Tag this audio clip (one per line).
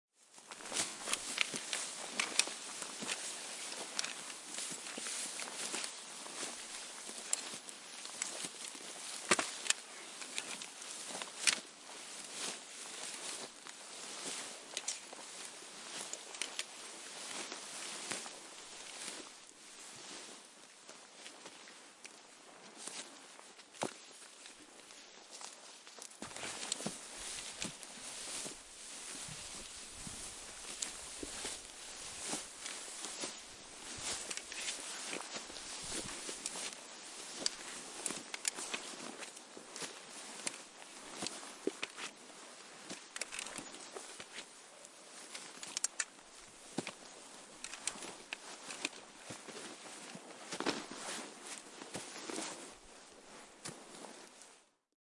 branches
field-recording
foley
forest
grass
metal
rustle
soldier